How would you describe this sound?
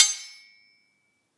Sword Clash (38)
This sound was recorded with an iPod touch (5th gen)
The sound you hear is actually just a couple of large kitchen spatulas clashing together
clang, clanging, clank, clash, clashing, ding, hit, impact, iPod, knife, metal, metallic, metal-on-metal, ping, ring, ringing, slash, slashing, stainless, steel, strike, struck, sword, swords, ting